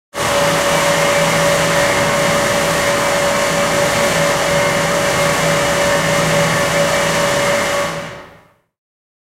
Machine Noise
Big heating machine in a high concrete room. Recorded in stereo with Rode NT4 with Zoom H4.
fan, pressure, grainy, machine, wind, noise, blowing, depressure, grain, concrete, heating, pipes, white-noise